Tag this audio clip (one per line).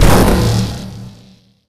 sci-fi
plasmagun
fire
shot
doom
gun
freedoom
weapon